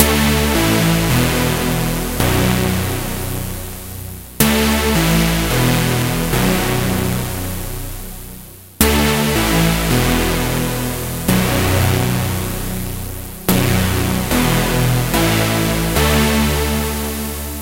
biggish saw synth d a b e 198 bpm-02
biggish saw synth d a b e 198 bpm
wave, acid, dub-step, club, dance, loop, synth, rave, techno, electro, trance, bass, electronic, saw, house